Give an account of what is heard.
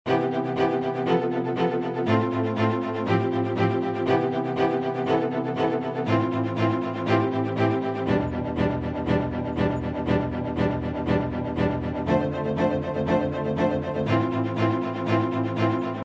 a string loop created using fruitu loops 11
string, loop, dubstep